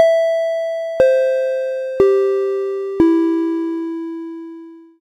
4-tone chime DOWN
A simple and short 4-tone chime going down.
microphone, ding, chime, pa